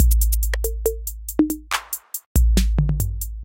70 bpm drum loop made with Hydrogen